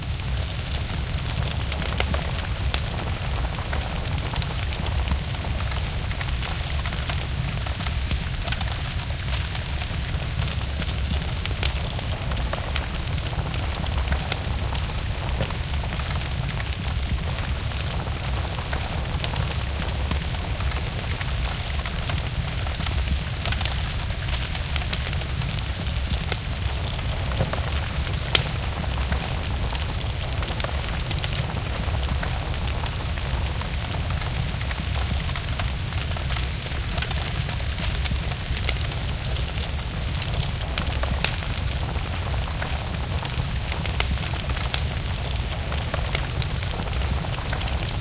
ELEMENTS FIRE 01 Burning-Room
Sound created for the Earth+Wind+Fire+Water contest
Stereo recording of a room where it goes all to fire
burn papers, old chairs of wood, plastic toys ..
Recorded using an Sennheizer microphone on a Minidisc
then panned processed equalized and in Acid Pro
fx, soundeffect, fire